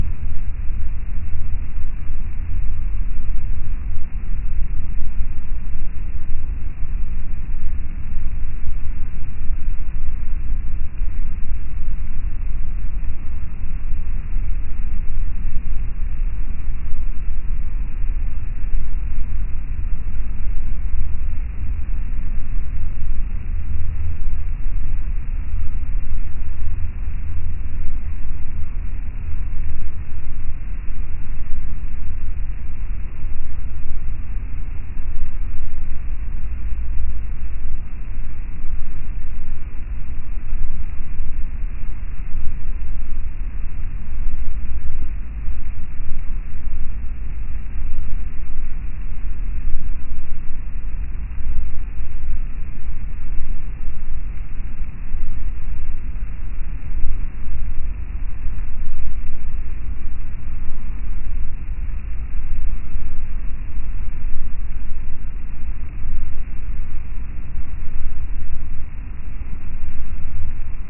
dead signal1
digital, distorted, field-recording, garbled, government, military, morse, radar, signal, soundscape, static